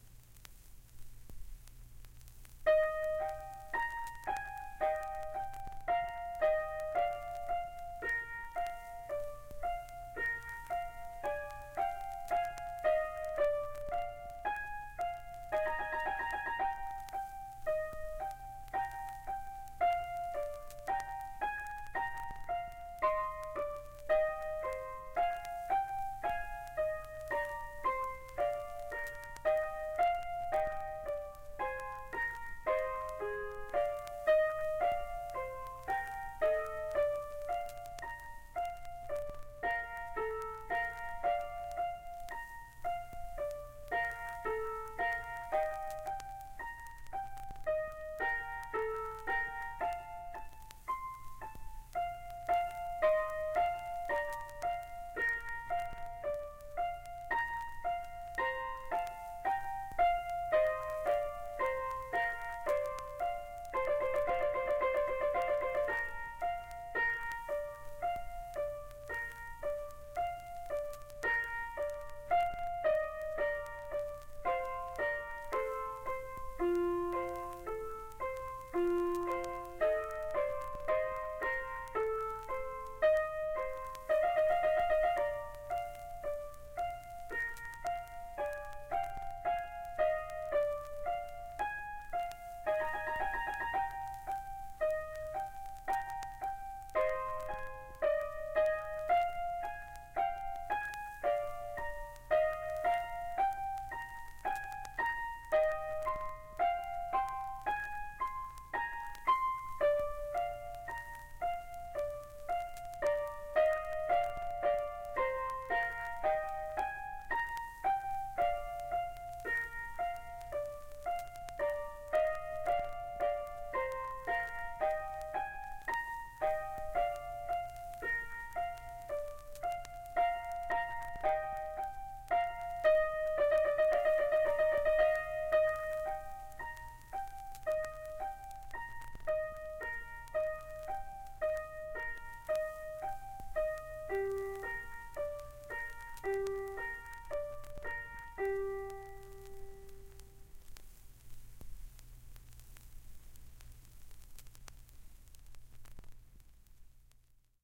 Linverno LP
Vivaldi's LInverno Largo Arranged to resemble LP sound.
analog, classic, classical, dark, dramatic, gloomy, Inverno, LP, midi, piano, vinyl, Vivaldi